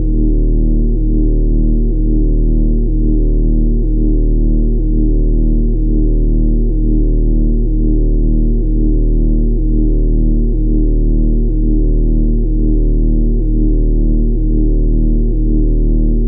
energy hum
A scifi energy blade hum sound. Made in audacity, based off of a distorted digiridoo sound, extended and added some basic wahwah for effect.
energy, humming, lightsword, hum, scifi